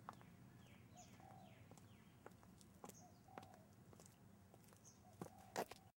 short outdoor field recording of a woman walking in high heels on concrete from about 15m away, then stepping off the sidewalk. There is a bird in the background.
concrete, female, heels, high, outdoor, walk